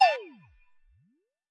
Tweaked percussion and cymbal sounds combined with synths and effects.
Short, Percussion, Oneshot, Effect, Percussive, Agogo